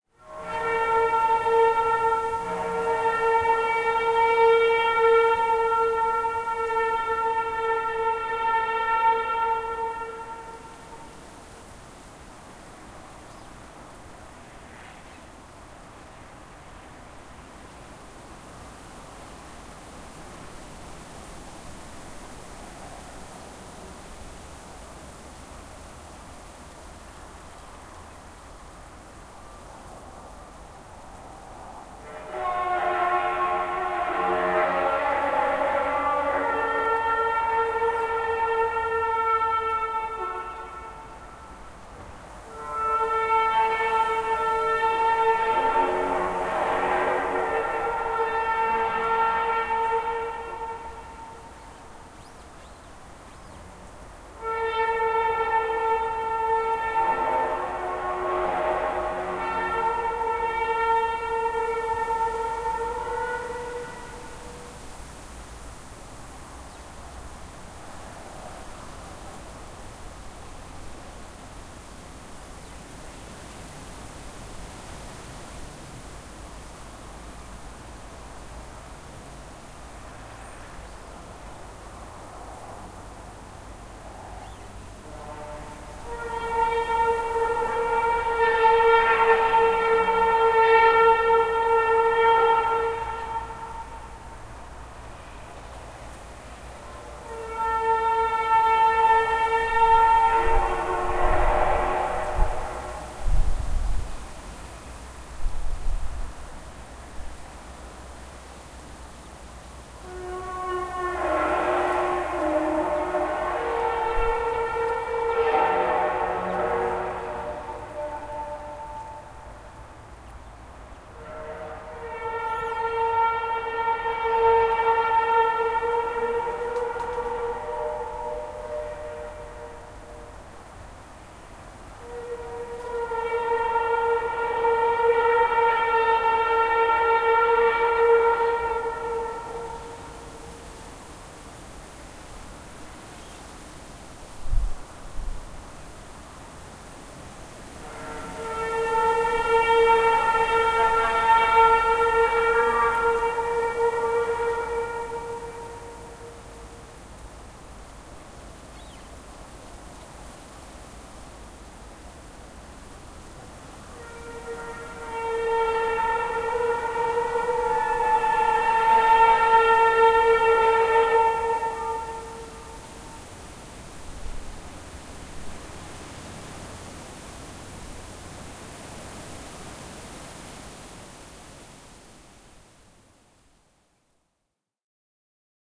Barn Noise
Strange noise that emanated from a barn that was being built on the Somerset/Wiltshire border. Possibly created by the machine laying the flooring. Also wind, some distant traffic, birdsong.